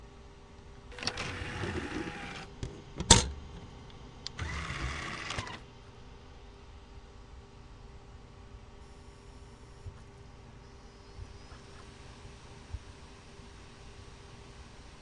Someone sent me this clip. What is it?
This sample is a recording of me interacting with my CDROM drive.